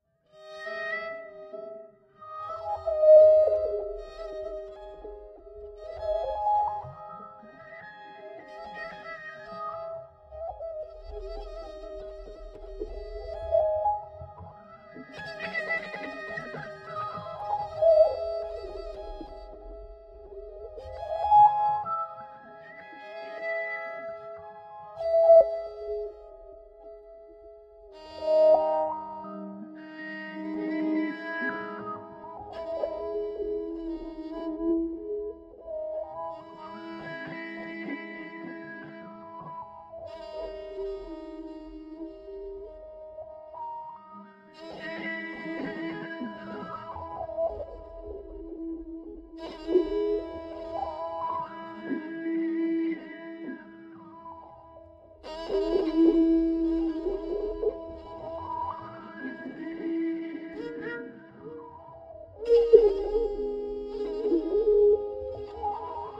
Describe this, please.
Band pass filters applied to a violin improvisation. Nice and ambient. No fades or other additional post production has been done.